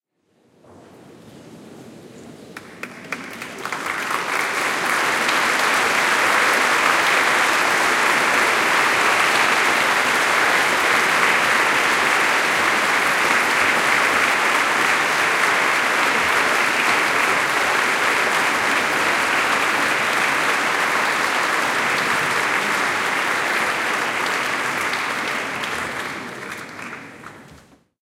This was recorded with a Zoom in a philharmonie concert.
orchestra, crowd, applaus